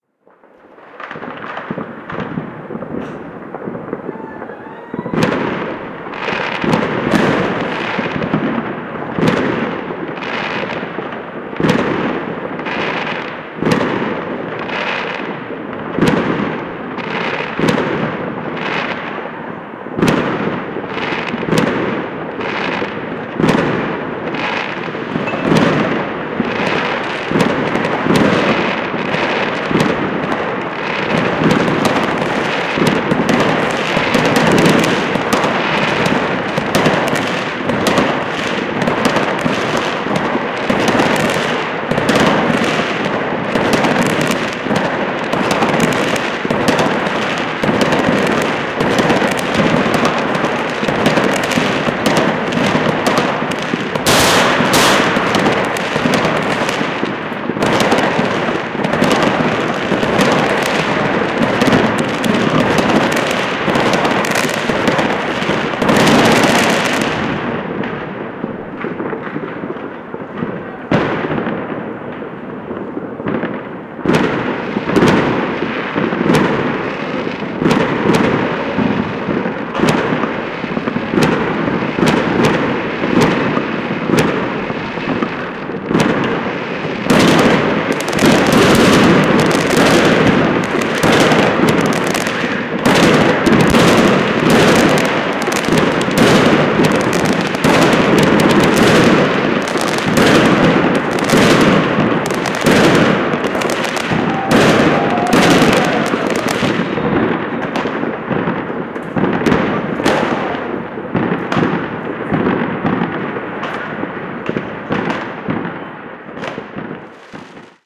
C.fieldechoes - New Year's Hell
The field recording of the New Year's Eve fireworks in a suburban area in Sibiu, Romania